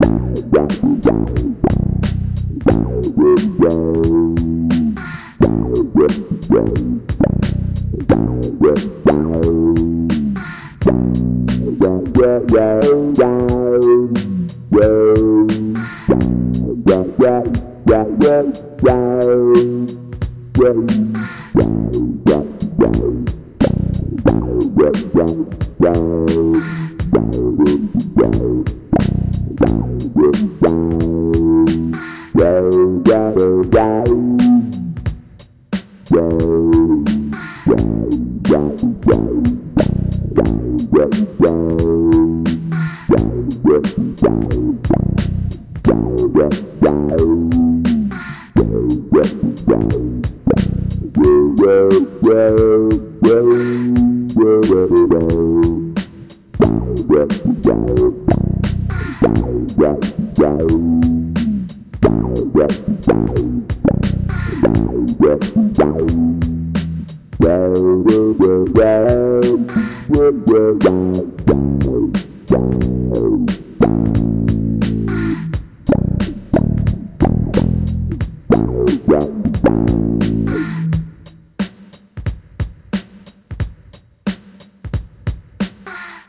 37987__sapht__
40849__simon-lacelle__hip-hop-drum-beat
contained in this loop added wah distort bass